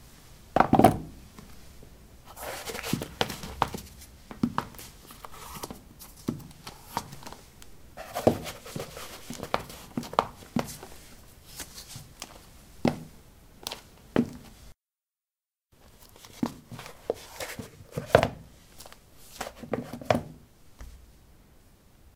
ceramic 08d womanshoes onoff
Putting woman's shoes on/off on ceramic tiles. Recorded with a ZOOM H2 in a bathroom of a house, normalized with Audacity.
footstep, steps